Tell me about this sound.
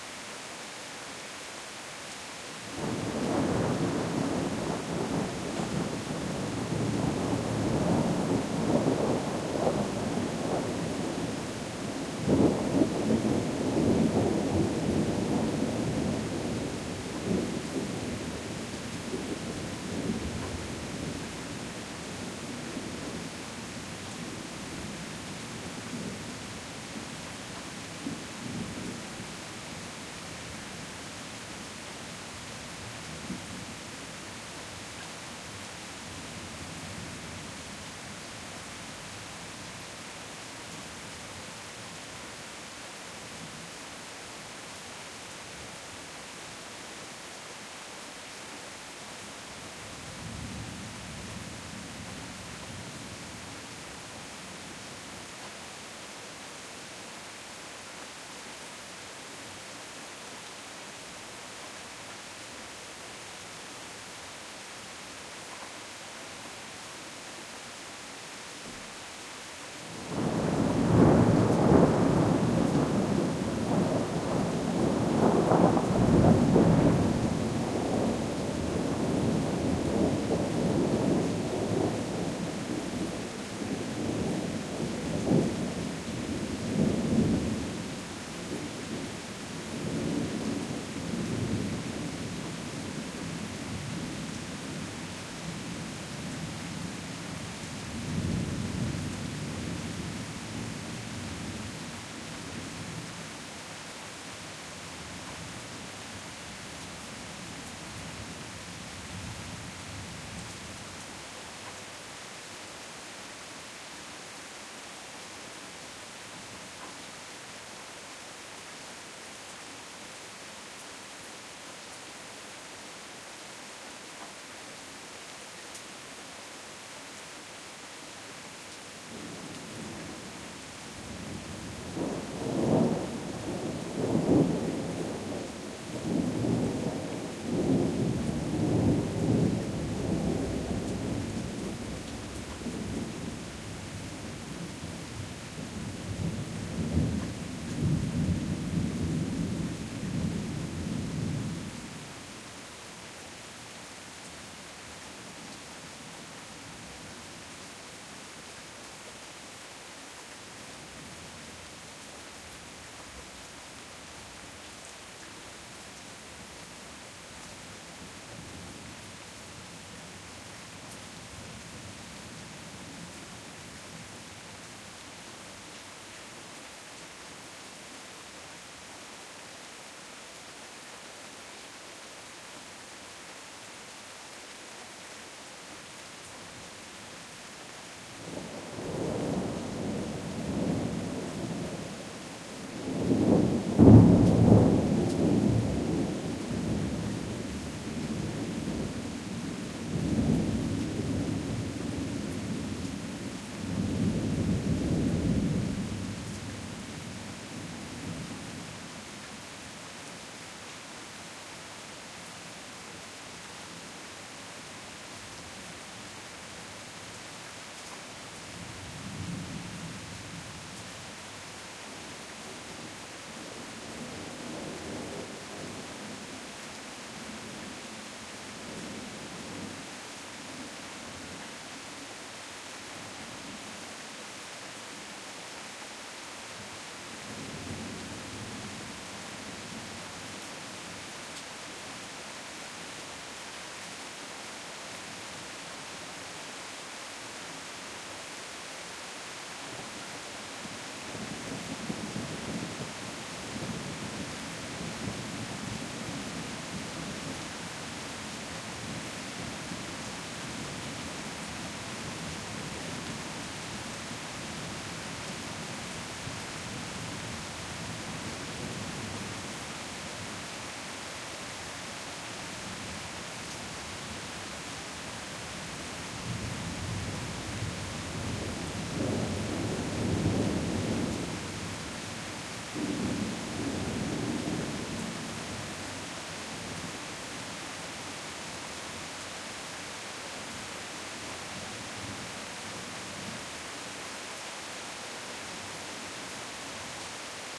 A few moments of a thunderstorm at night. AT835ST microphone into Oade FR-2LE.
lightning, storm, thunderstrom, thunder, rain, field-recording